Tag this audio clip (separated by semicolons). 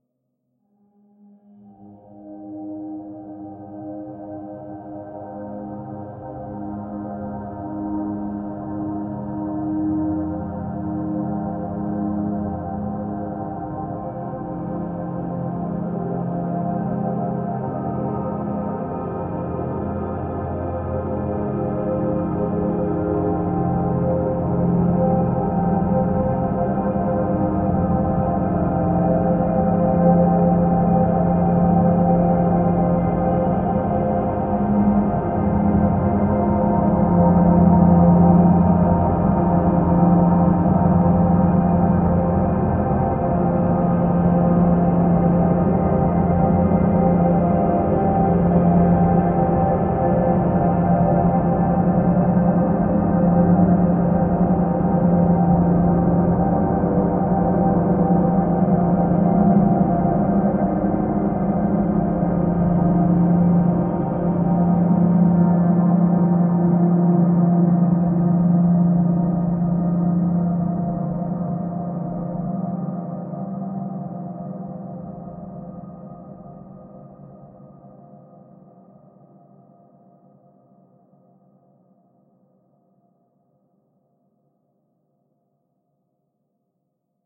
ambient; artificial; dreamy; drone; evolving; multisample; pad; smooth; soundscape